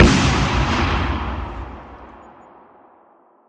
cannon boom2b
An explosion with added reverb and slightly enhanced bass.
boom, cannon, echo, explosion